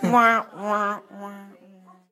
A friend saying "wah-wah-wah-wah" while we were talking about random things. I have no idea if he's just doing nonsense. I have no idea how you will use this. Good luck.